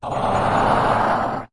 Something positive is happening. Probably medical too. Magimedical! Made with the Granular Scatter Processor.
Edited with Audacity.
Plaintext:
HTML:
action, adventure, dark, enhancement, fantasy, feedback, game-design, game-sound, level-up, positive, power-up, role-playing-game, rpg, sci-fi, video-game